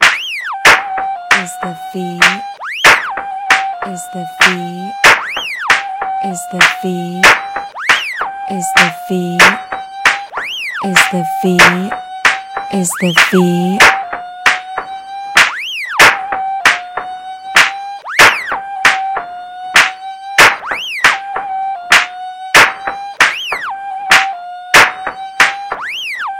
This is a clip of music using advanced transformations in time and frequency with the HPS model to process sounds from drums, a flute, and the human voice. The sounds which support this piece are:
frequency, flute, voice, speech, modification, time, drums, female